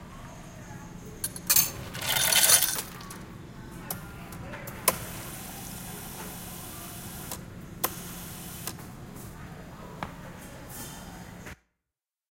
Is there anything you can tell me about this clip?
Recording of a self-serve soda fountain. Begins with ice falling into a cup followed by the drink filling up from a carbonated nozzle. Recorded in the East Village Commons dining hall at the University of Georgia using a Roland R-09.
carbonation, soda, ice-machine, ice, drink, soda-fountain